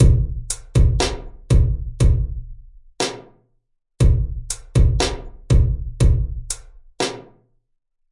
beat 120bpm
beat, 120bpm, loop, rhythmic, drums